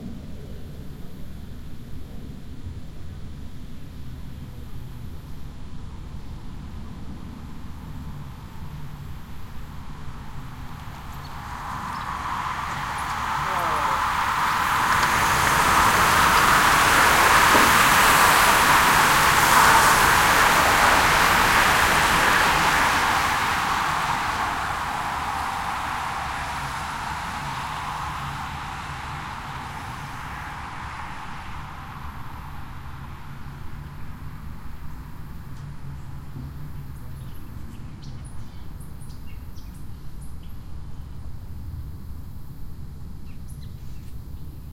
passbys w talking 3
Group pass-by with talking then some birds.
Part of a series of recordings made at 'The Driveway' in Austin Texas, an auto racing track. Every Thursday evening the track is taken over by road bikers for the 'Thursday Night Crit'.
bicycle, field-recording, human, nature